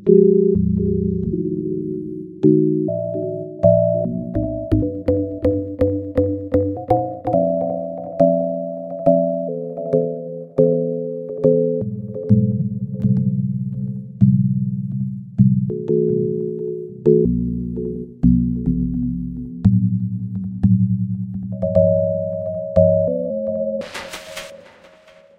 dub bell 009 efxatmo
bell/vibe dubs made with reaktor and ableton live, many variatons, to be used in motion pictures or deep experimental music.
reaktor, vibe, dub, bell